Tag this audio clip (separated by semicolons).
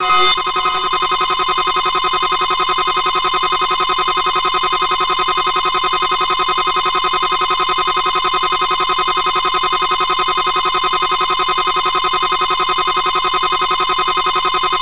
Listening; Easy; new; Psychedelic; NoiseBient; Dark; Experimental; Darkwave; Ambient; Noise; breakbeat; Noisecore